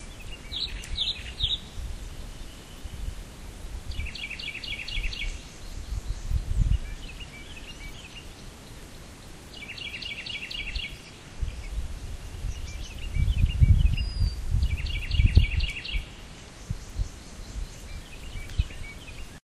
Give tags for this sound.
bird birds birdsong field-recording forest morning nature spring

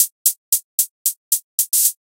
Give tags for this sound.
hi loop hat

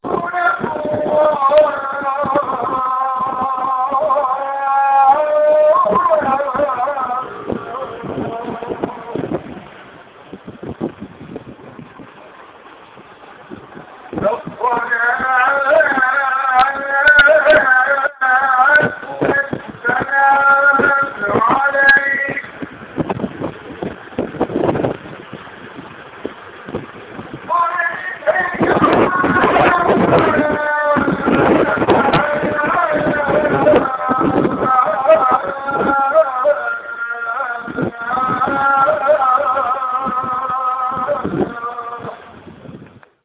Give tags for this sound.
arab arabic bodrum muslim prayer sing turkey

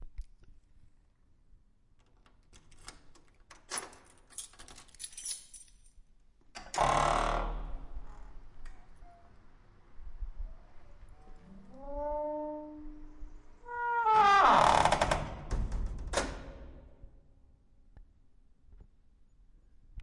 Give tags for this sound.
Close Door Heavy jail keys lock locking Metal Open prison Shut squeak

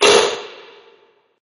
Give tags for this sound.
Monster Halloween Scary

